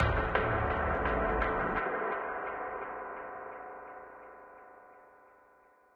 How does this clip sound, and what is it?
MSfxP Sound 543
Music/sound effect constructive kit.
600 sounds total in this pack designed for whatever you're imagination can do.
You do not have my permission to upload my sounds standalone on any other website unless its a remix and its uploaded here.
For more similar sounds visit my old account. Cheers/Bless